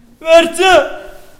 It's a scream
scream, agony, 666moviescreams, upf, terror, funky